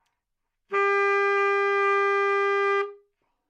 Sax Baritone - A#2
Part of the Good-sounds dataset of monophonic instrumental sounds.
instrument::sax_baritone
note::A#
octave::2
midi note::34
good-sounds-id::5305
Asharp2, baritone, good-sounds, multisample, neumann-U87, sax, single-note